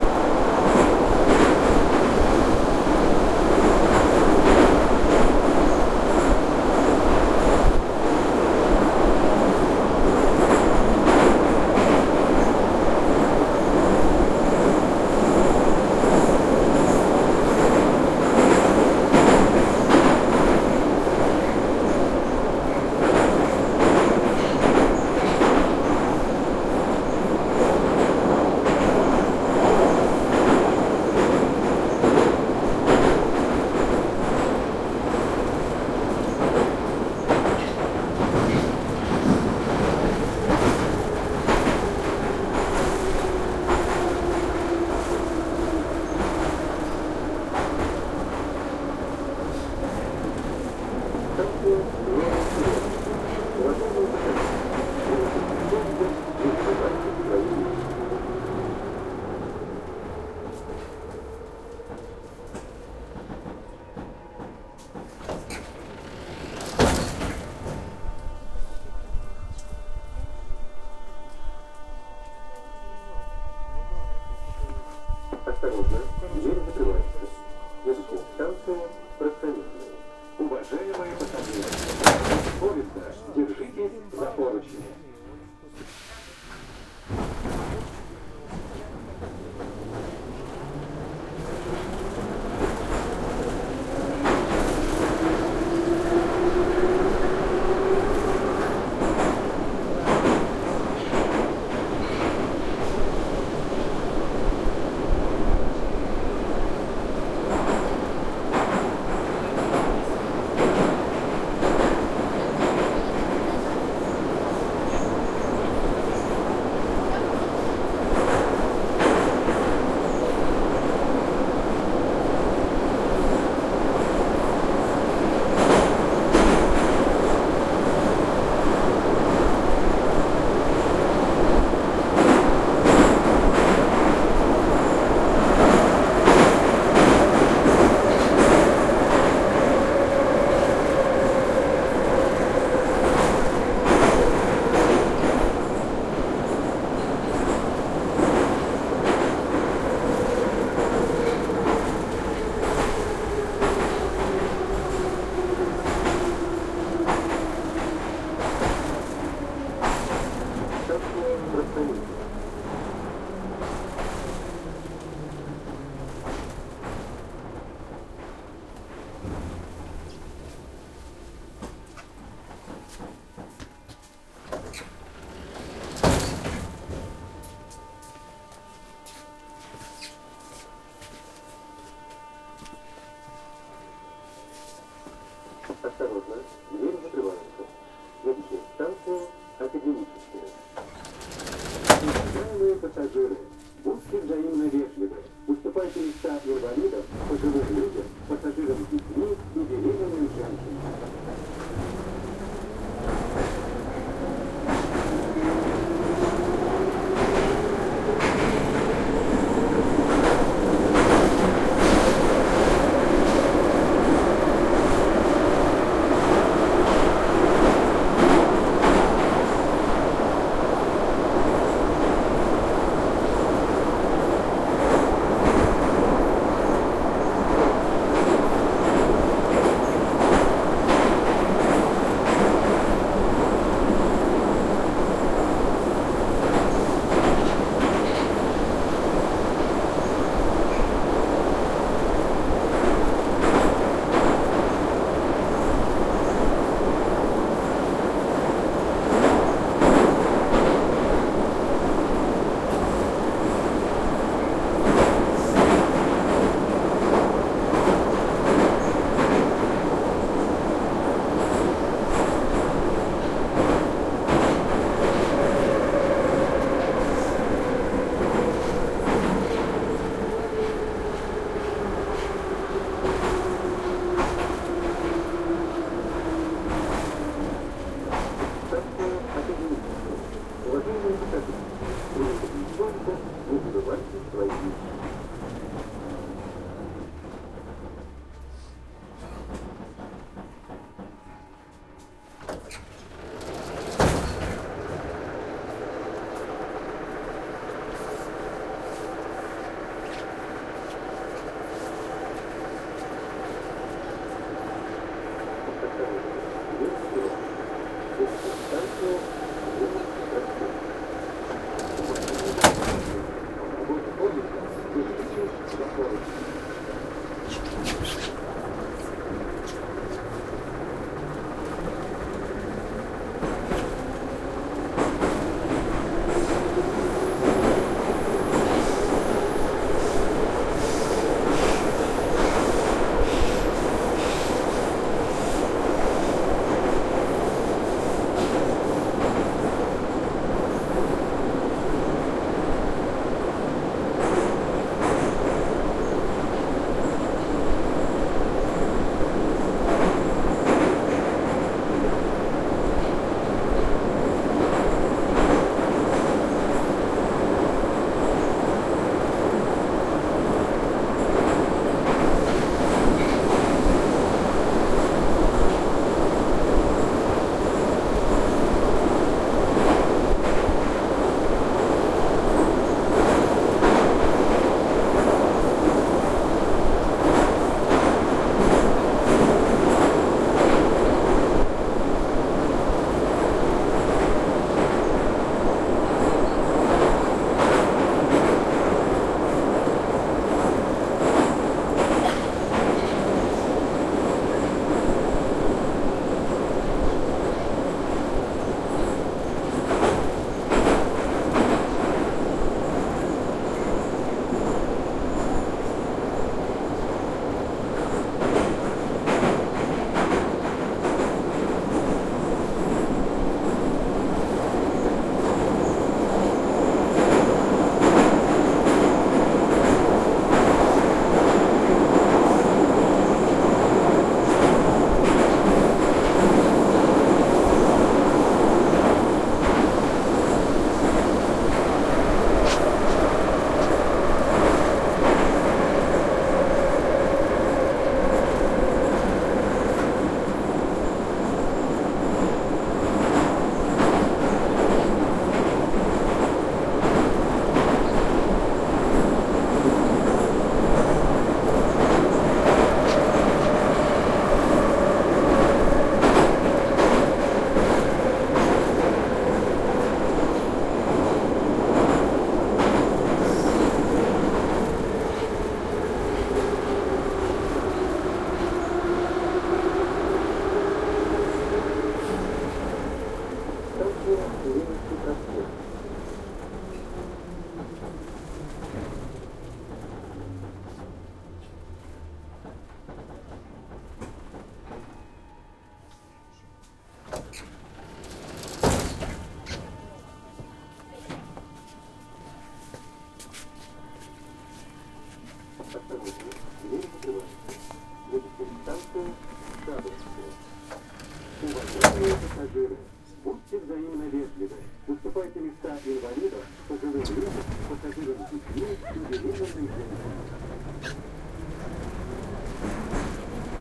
Moscow subway ride - Orange line - Novye Cheremushki to Leninskiy Prospekt XY mics
Moscow subway ride - Orange line - Novye Cheremushki to Leninskiy Prospekt
train interior, with stops, announcements, people talking
Roland R-26 XY mics
wagon, station, Russia, Moscow, ride, subway, Russian, doors, people, underground, train, metro, orange-line, announcement, field-recording